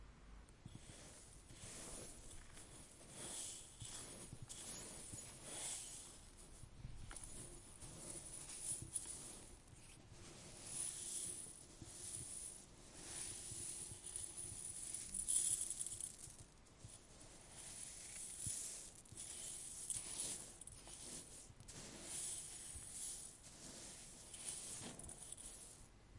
Digging a clay pot out of sand
Needed a sound for a clay pot being dug out of sand. I used rice and a small ceramic pot for this
sand, bottle, rice, claypot, ceramic, container, clay, adpp